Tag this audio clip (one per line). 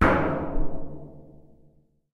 metal
barrel
percussive
single-hit